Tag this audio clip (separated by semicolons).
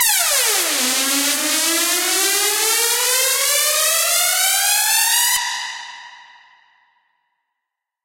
179BPM; Bass; Beat; DnB; Dream; Drum; DrumAndBass; DrumNBass; Drums; dvizion; Fast; Heavy; Lead; Loop; Melodic; Pad; Rythem; Synth; Vocal; Vocals